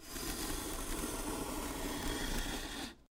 Scraping some styrofoam with a fork. Recorded with an AT4021 mic into a modified Marantz PMD 661.
creak, funny, styrofoam